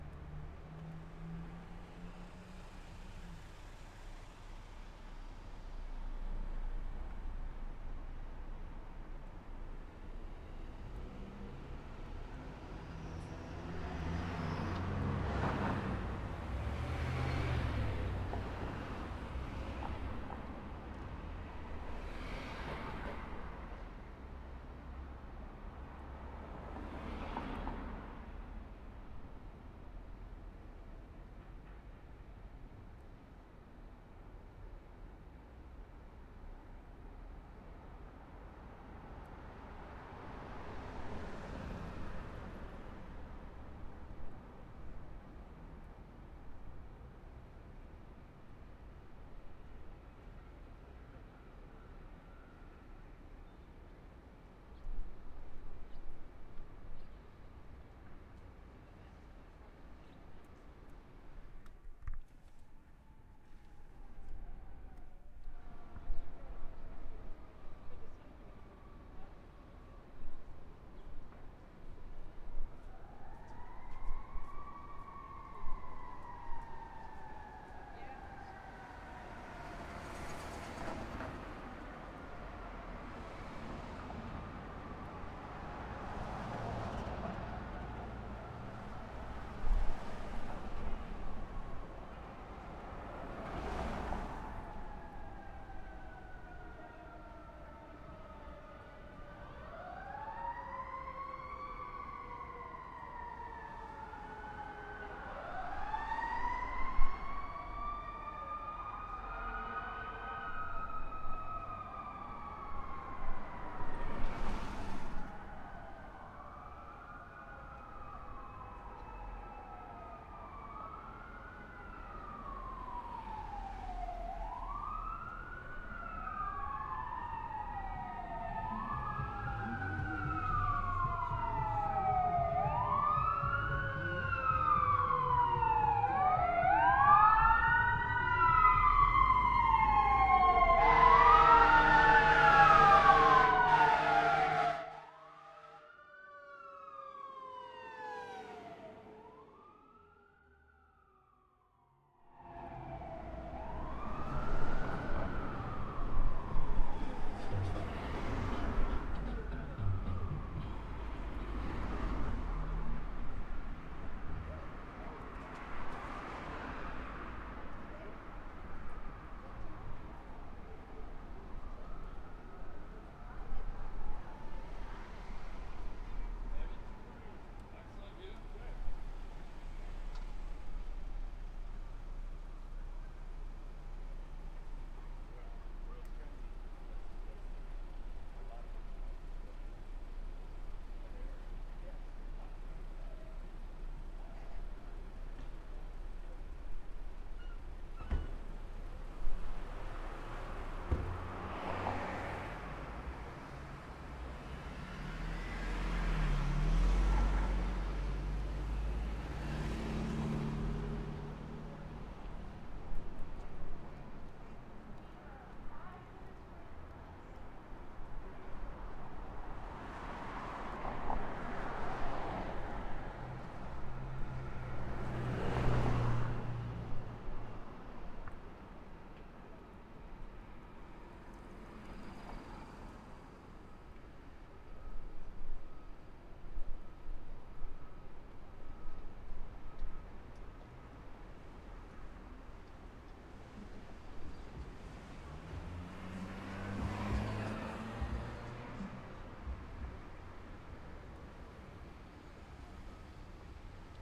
Moderate sized city street, ambient with fire truck. Had to take the level way down because it went right by me. Sorry I took it too low for a moment.